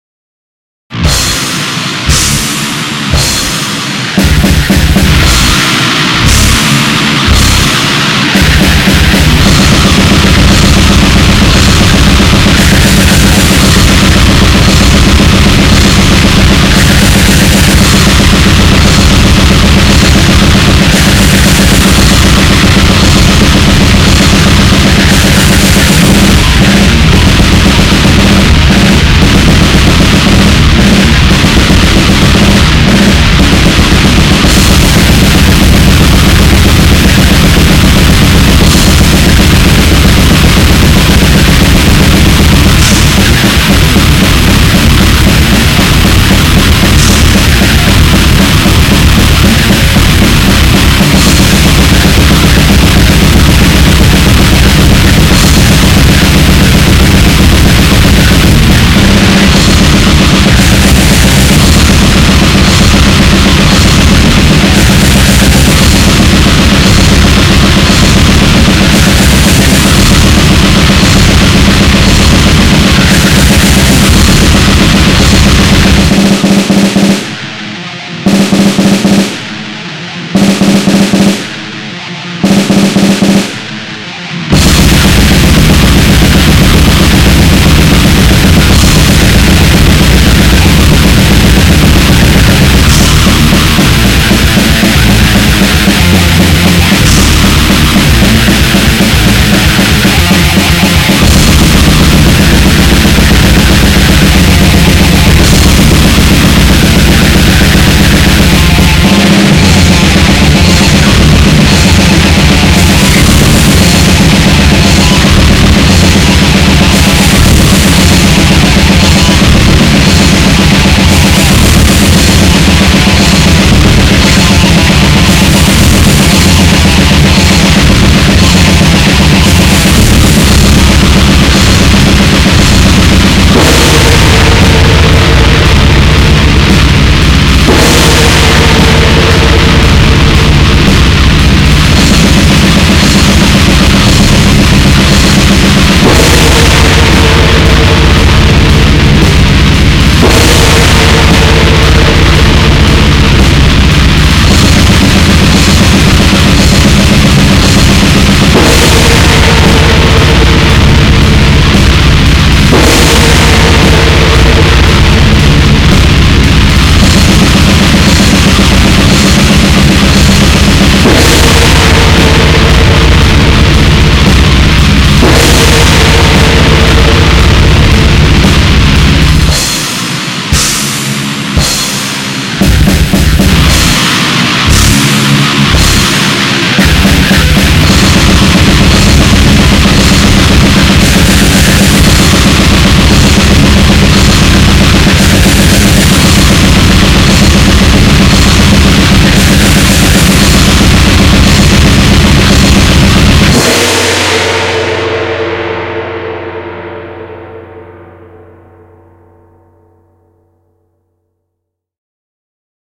death grind
track, heavy